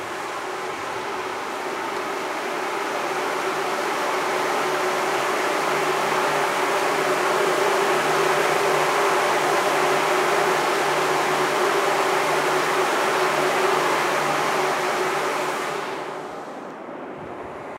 FX - cisterna abastecimiento
tank, water